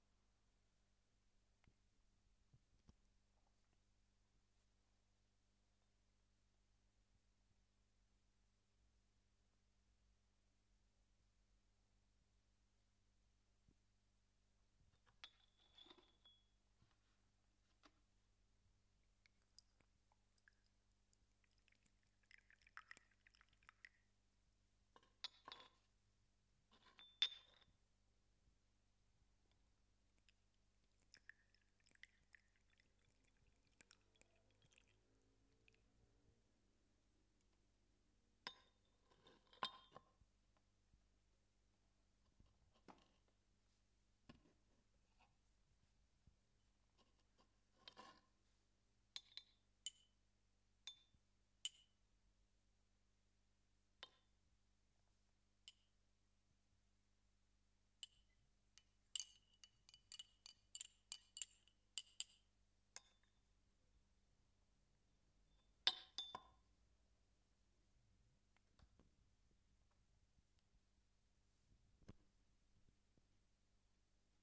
tomando el te
Acción de servir agua caliente sobre una taza de té, luego revolver y mezclar con el azúcar usando una cucharita / putting and serving hot water in a tea cup and then giving it a quick stir with a little spoon.
cup, drinking, foley, revolver, stir, taza, te, tea